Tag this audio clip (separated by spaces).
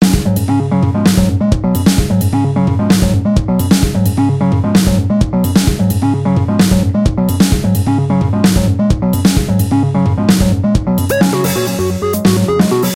music-for-videos music download-music vlog electronic-music download-background-music audio-library background-music vlogger-music free-music vlog-music syntheticbiocybertechnology free-vlogging-music loops vlogging-music free-music-download sbt free-music-to-use prism music-loops download-free-music music-for-vlog